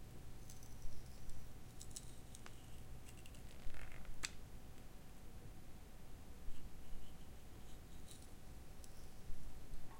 BC peeling skin
skin peeling peeled off bone